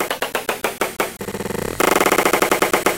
first experiment with LiveCut beta 0.8the new "Live BreakBeat Cutting tool"from mdsp @ Smartelectronix.. 4 bar jazz break treated with the warp mode(pt 2 of 2)
glitch, breakbeat